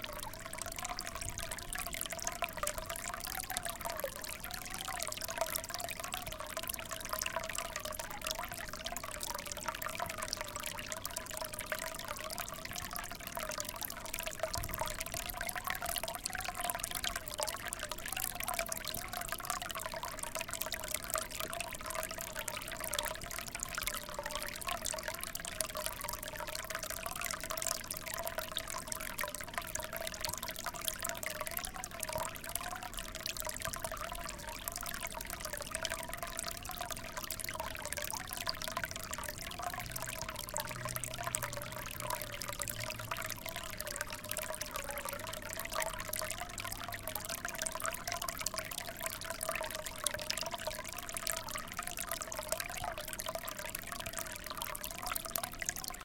water-spout-japanese-garden-botanical-gardens
Birmingham UK Botanical Gardens Japanese Garden water feature with the cafe kitchen extractor fan in the background
H2 Zoom front mic, windshield, some wind noise but not enough breeze to sound the wind chimes
uk, field-recording, japanese-garden, trickle, botanical-gardens, water, birmingham, spout